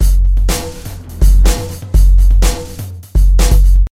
Breaks Fat Hammer Beat 04
big beat, dance, funk, breaks